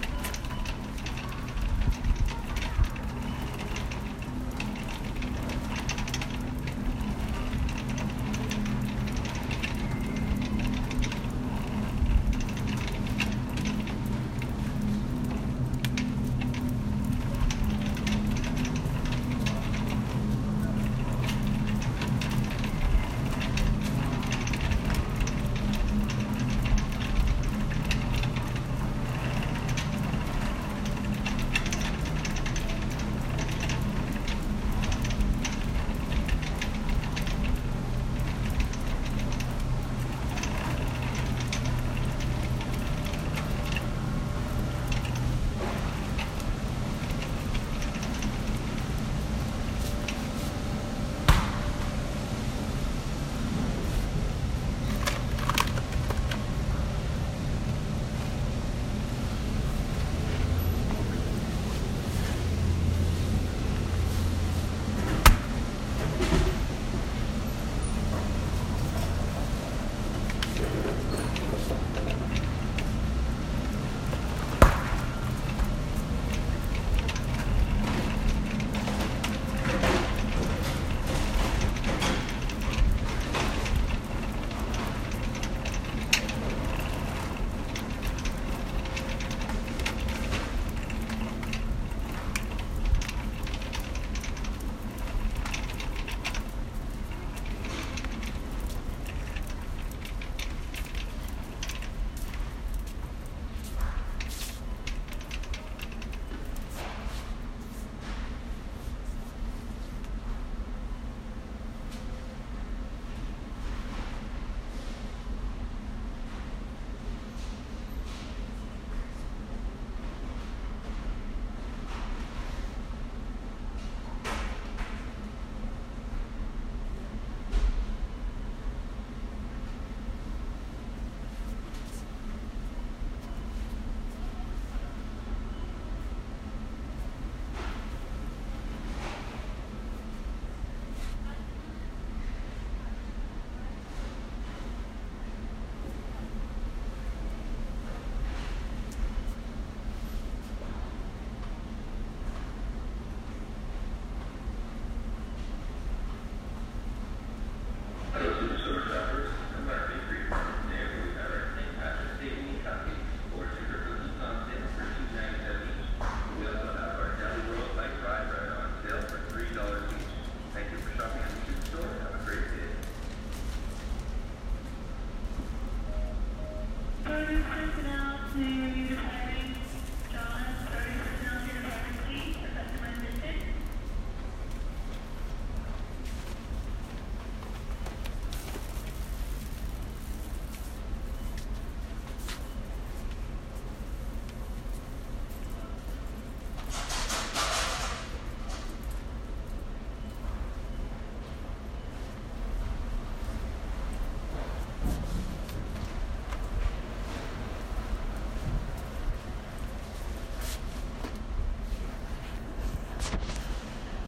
Shopping cart wheels, freezer Hum, cashier scanning beeps, announcement on PA